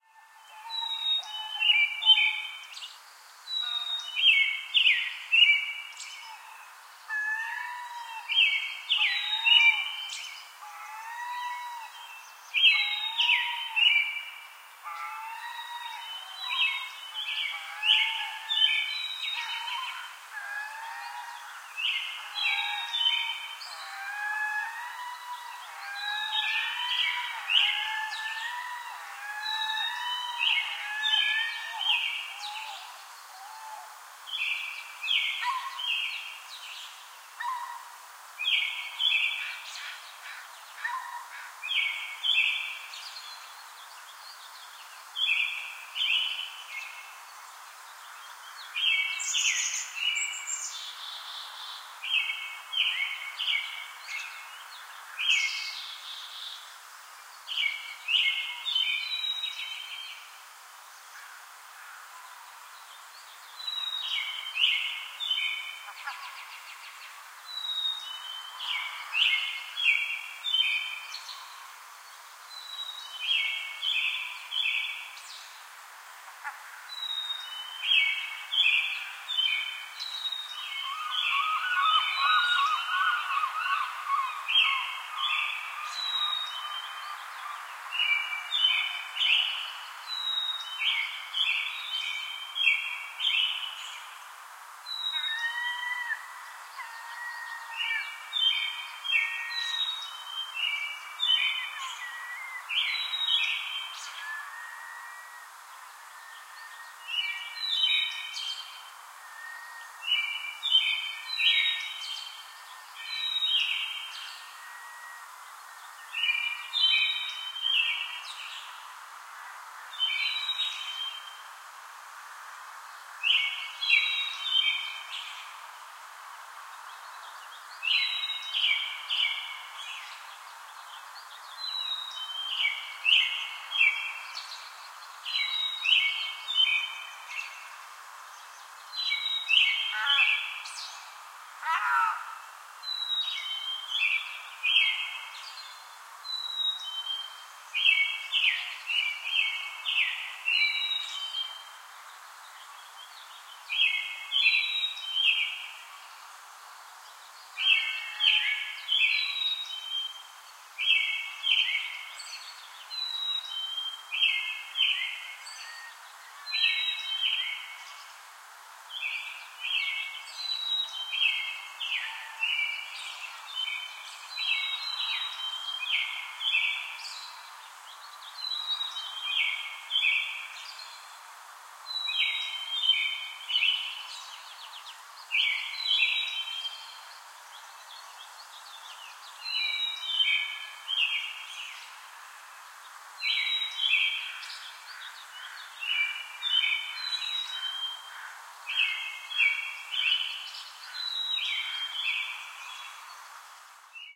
Birds recorded in Kitsilano, Vancouver
Used a nt4 to a sounddevices 722